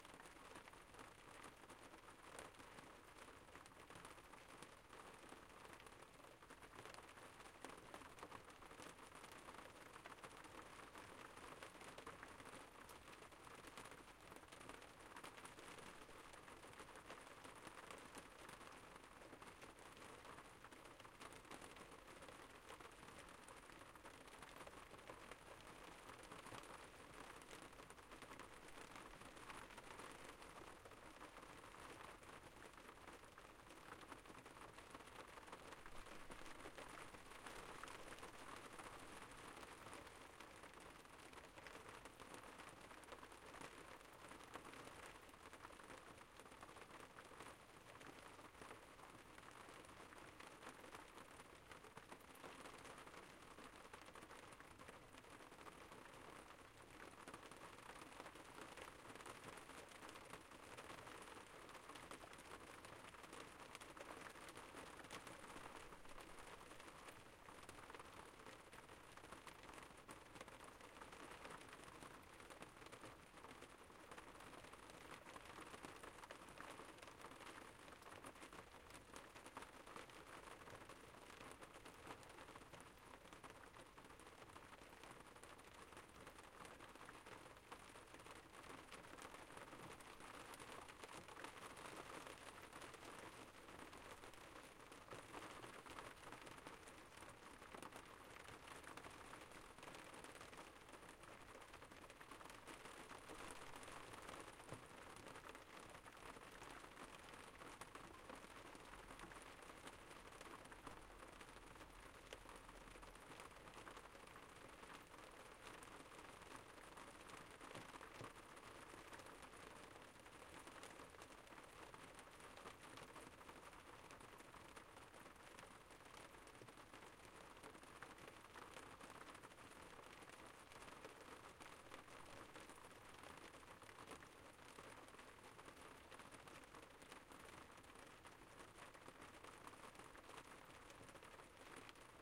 Rain Loop 2
Loopable rain
Olympus LS-12, internal capsules
field-recording, loop, loopable, nature, rain, rainfall, shower, sprinkle, weather